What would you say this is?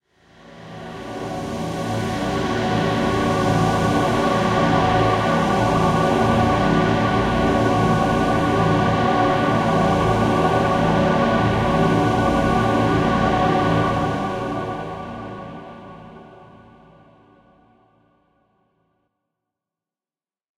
Dramatic choir 4
Deep and dark dramatic choir with alot of disonances. Dreamy and blurry sound is it's qualities.